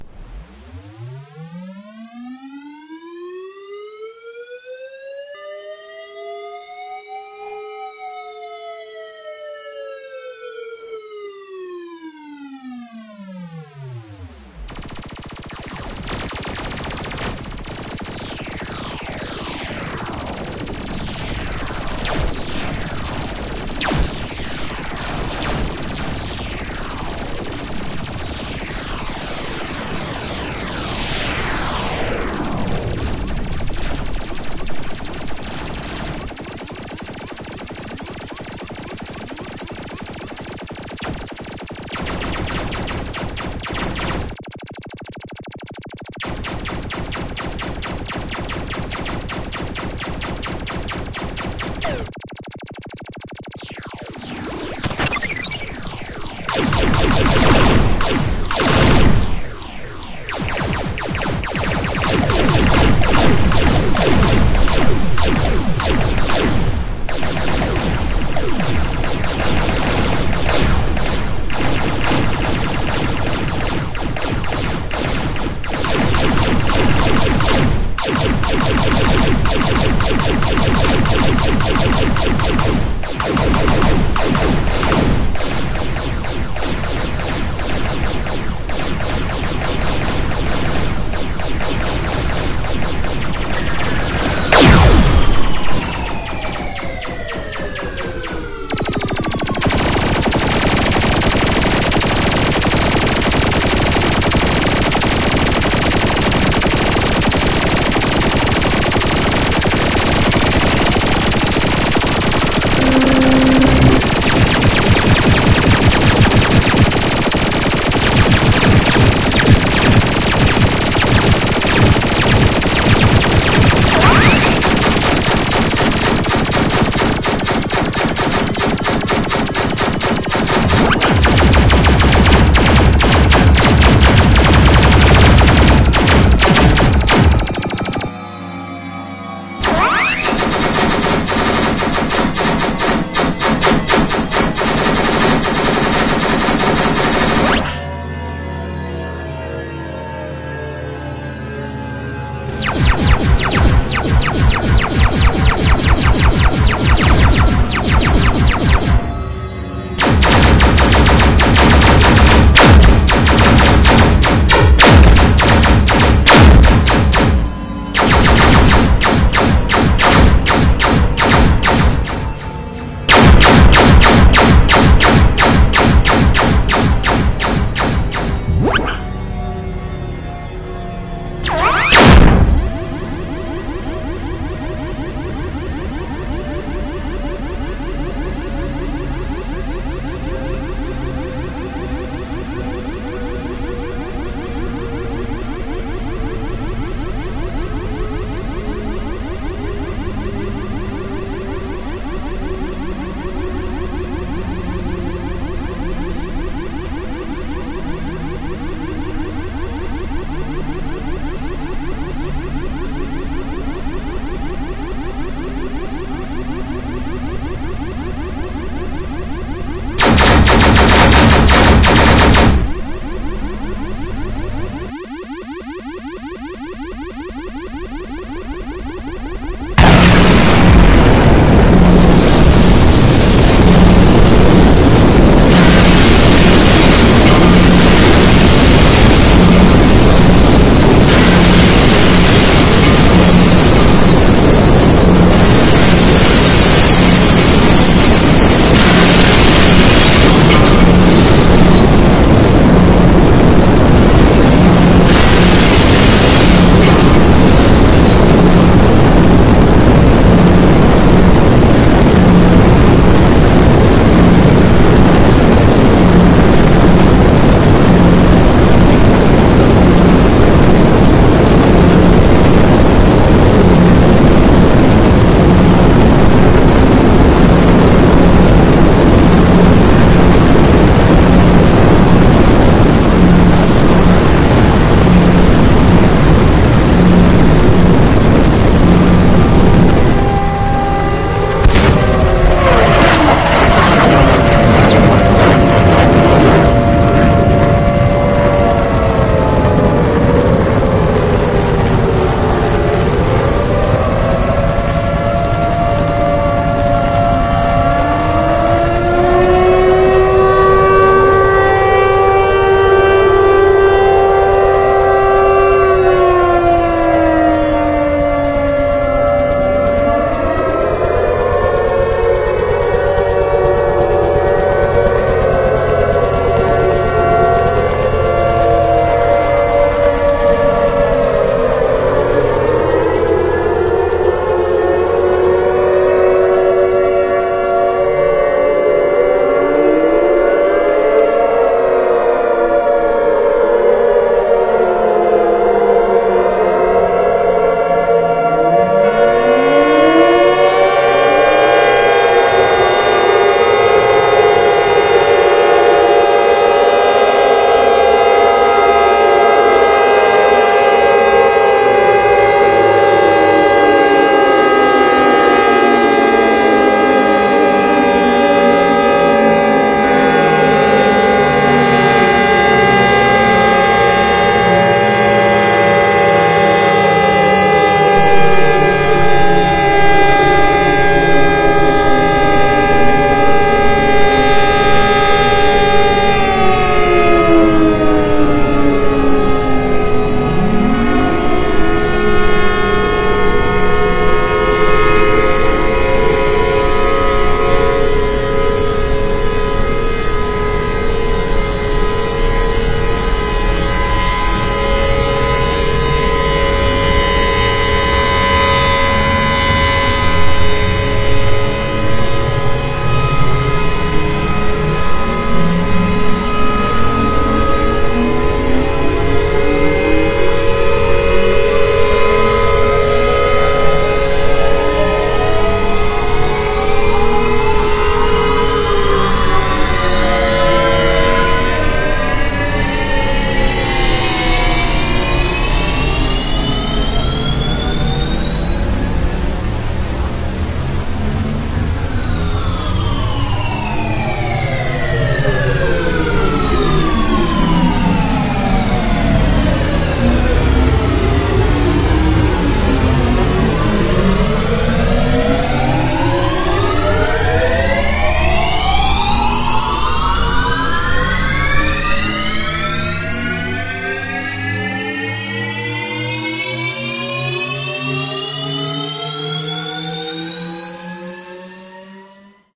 Earth vs space battle
This is a soundscape. Someone please describe the waveform of this file, in other words, tell me what it looks like!
ufos, ufo, glass, explosion, helicopters, soundscape, army, cannon, lazers, lazer, processed, airhorn, cannons, alarm, fire, helicopter, shots, siren, fright, sirens, shot, horn, space, beam, beams, battle